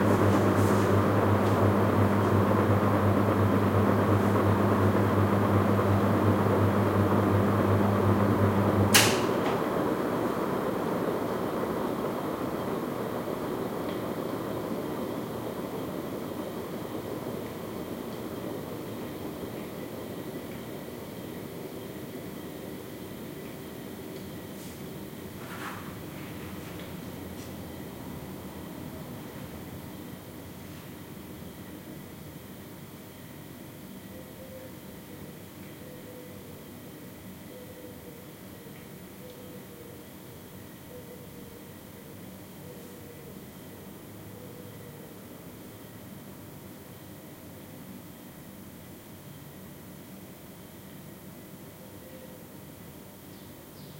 20180831.ceiling.fan.stopping
Noise of a Westinghouse ceiling fan, stopping. Sennheiser MKH60 + MKH30 into SD Mixpre-3. Decoded to mid-side stereo with free Voxengo plugin.
air, fan, field-recording, heat, motor, summer, wind